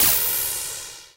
another laser gun shot
weapon, space, gun, 8bit, aliens